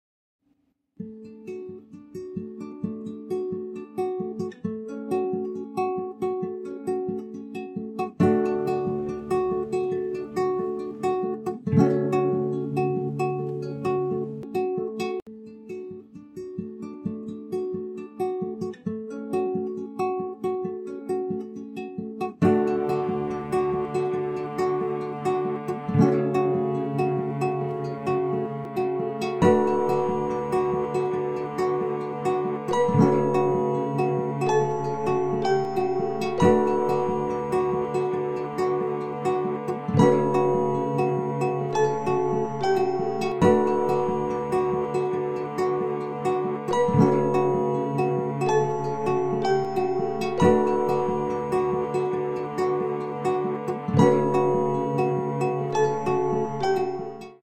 listen to the nature
chill, chilled, relax